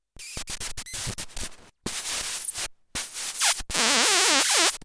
A czech guy named "staney the robot man" who lives in Prague build this little synth. It's completely handmade and consists of a bunch of analog circuitry that when powered creates strange oscillations in current. It's also built into a Seseame Street toy saxiphone. Some hissy noise sounds.